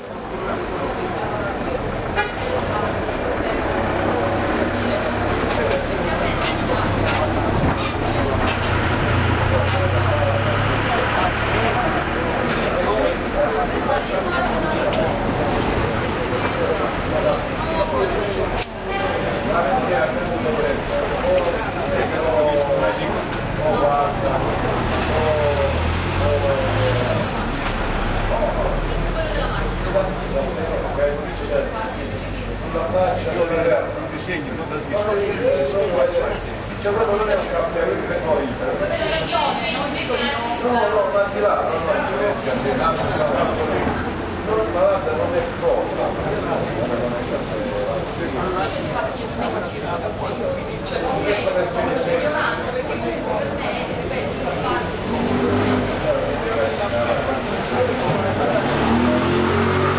ambience in bars, restaurants and cafés in Puglia, Southern Italy. recorded on a Canon SX110, Ugento
ambience, caf, chatter, field-recording, italy, restaurant